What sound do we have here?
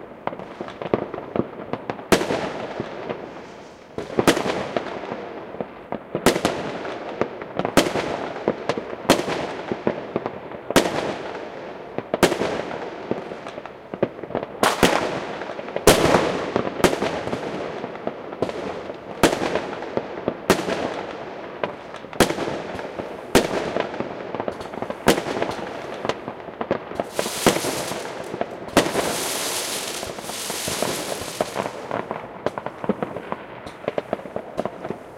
Recorded with the zoom recording device on New Year's Eve 01.01.2019 in Hessen / Germany. A firework in a small town.